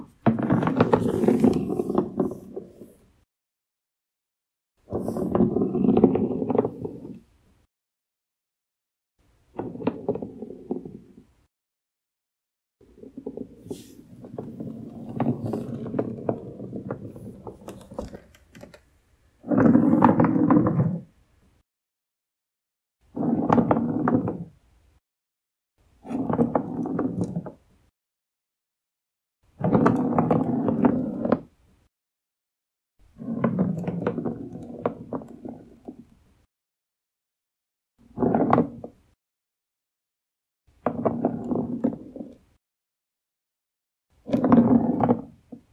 Rolling Ball Wood Floor - Various
A metal ball rolling across a wooden floor at various speeds.
wood, metal, roll, rolling, labyrinth, ball, foley, floor